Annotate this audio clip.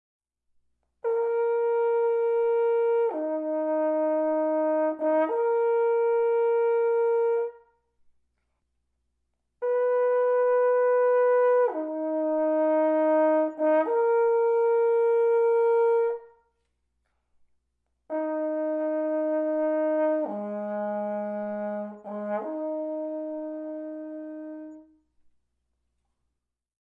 horncall bruckner4 Bbminor
A haunting, distant horn call in B-flat minor from the opening to Bruckner's 4th Symphony. Recorded with a Zoom h4n placed about a metre behind the bell.